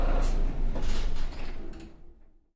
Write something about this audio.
bus engine shutdown inside
When bus engine is shutting down
interior, shutdown, engine, transportation, vehicle, bus